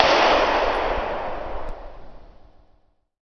redpyramid IR
Some processed to stereo artificially.
impulse, response, vintage